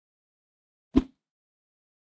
High Whoosh 07
swoosh, whip